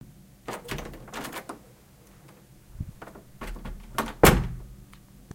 Recorded with a black Sony IC digital voice recorder.